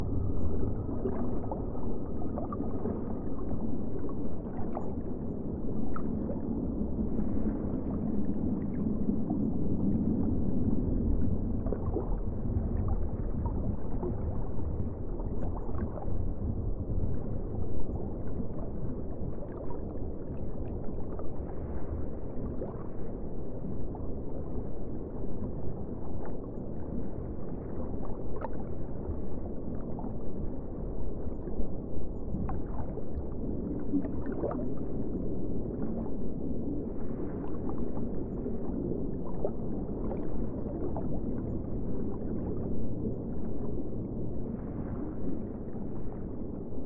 Underwater ambience, meant to sound like the deep ocean.
This is not an actual underwater sound. The low ambience in the background is soft drink can noises slowed down to 0.025 speed. Water and wave sounds have also been mixed in, with a low pass filter to muffle them. With a crossfade, this sound could also be loop-able.
Recorded with a H4n Pro, edited in Audacity.
Soft drink noises recorded 01/09/2019
Water Sounds recorded 11/05/2019
Final sound created 29/01/2020
ocean, ambience, sea